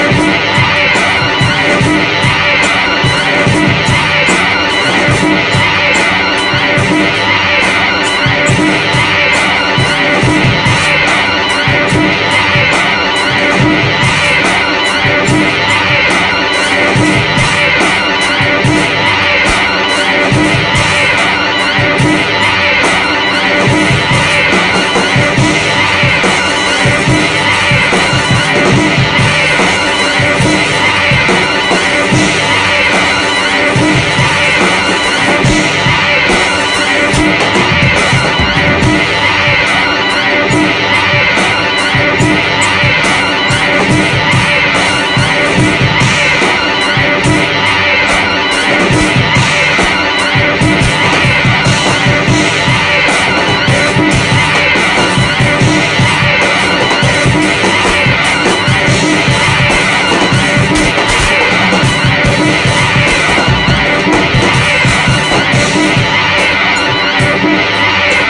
mindflayer style beat 2
drums, glitch, guitar, live, loops